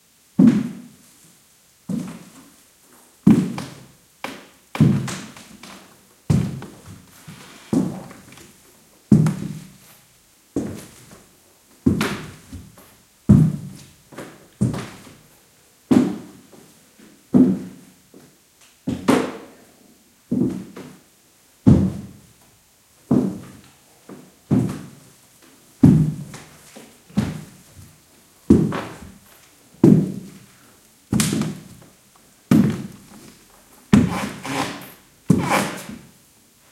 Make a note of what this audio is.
20190101 wooden.floor.slow.walking
Slow, boot footsteps on wooden floor. EM172 Matched Stereo Pair (Clippy XLR, by FEL Communications Ltd) into Sound Devices Mixpre-3 with autolimiters off.
creaking, wooden, footsteps, stairs, walk, floor, field-recording